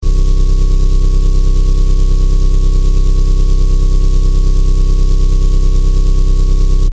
Car engine idle
Here is a loop of a car engine I made in Flstudio.
Hope you enjoy it.